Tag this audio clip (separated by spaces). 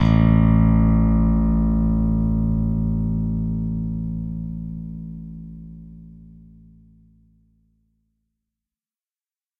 Ableton-Bass Ableton-Loop Bass Bass-Groove Bass-Loop Bass-Recording Bass-Sample Bass-Samples Beat Compressor Drums Fender-Jazz-Bass Fender-PBass Funk Funk-Bass Funky-Bass-Loop Groove Hip-Hop Jazz-Bass Logic-Loop Loop-Bass New-Bass Soul Synth Synth-Bass Synth-Loop